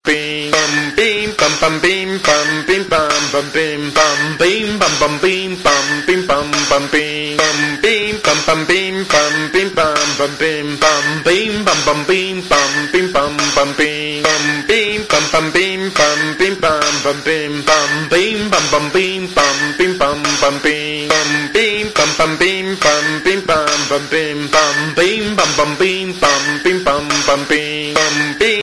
A playfull vignette with a "Pam pam pim" vocal and a marked beat remembering a hammer and other tools. A cartoonish wistle of a sleeping character is the cherry on the cake.
Goes well with cartoons and games, in transitions or neutral speechless scenes. The sound can also represent a group of manual workers os something like that.
Made in a samsung cell phone (S3 mini), using looper app, my voice and body noises.